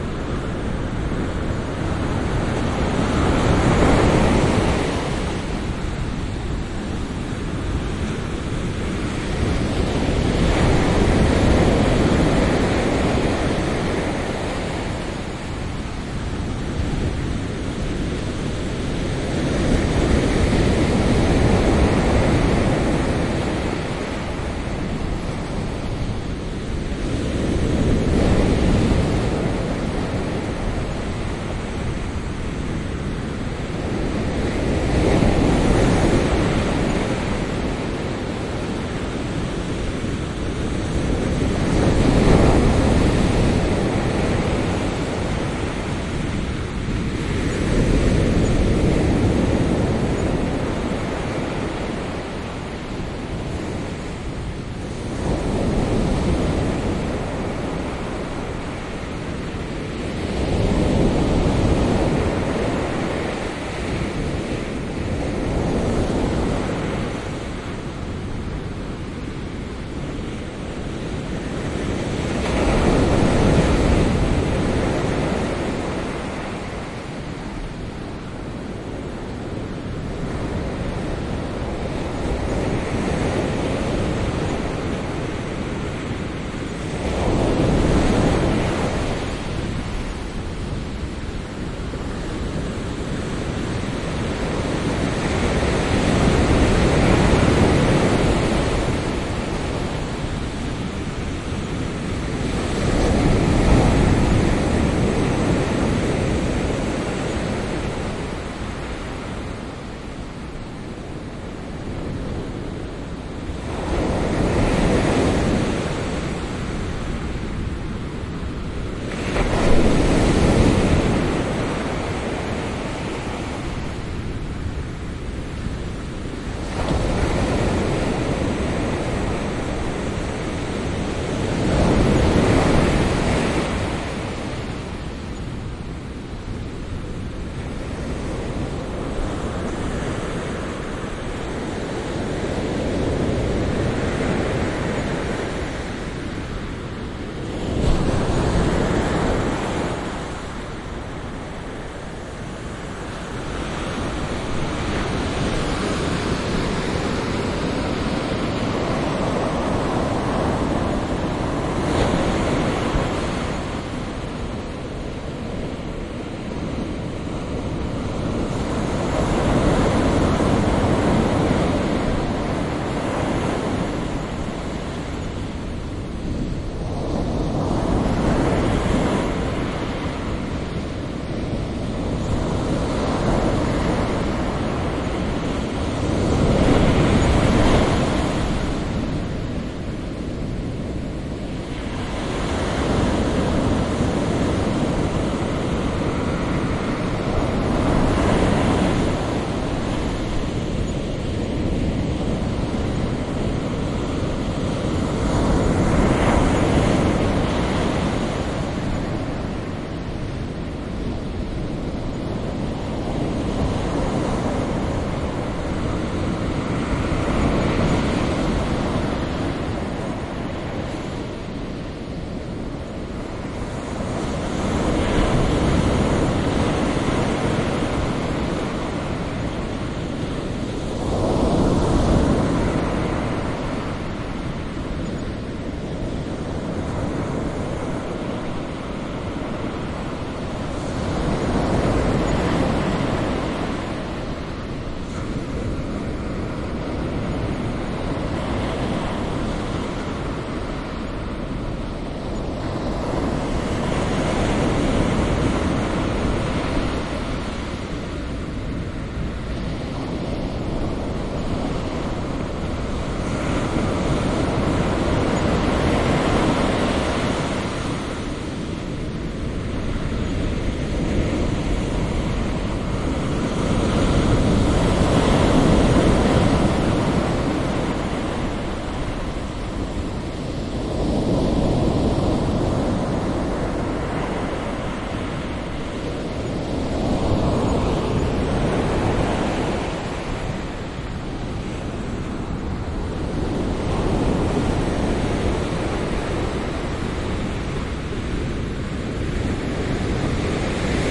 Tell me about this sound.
porto 19-05-14 Quiet day, close recording of the breaking waves
Quiet day, close recording of the breaking waves.
atlantic, beach, binaural, breaking, cavern, Douro, Duero, ocean, Oporto, Porto, rock, sand-beach, sea, soundman, sounscape, surf, vilanova-de-gaia, walking, wave, waves